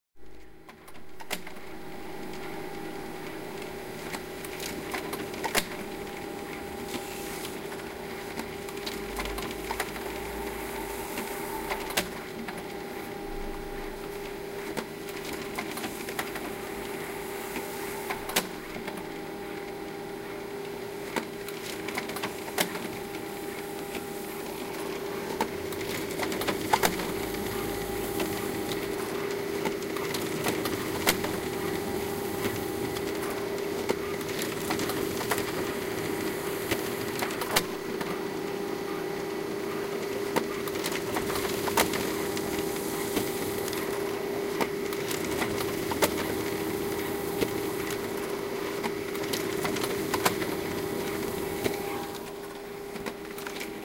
samsung laser printer rhythm mic movement
unrelenting samsung laser printer in successful rhythmic print activity, with some audible movement of the recorder.
Edirol R-1
machines, field-recording, office, laser-printer, printer